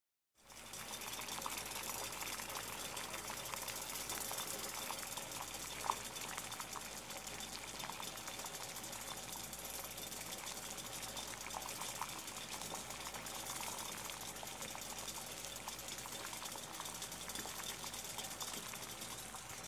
Boiling water
Water at boiling point.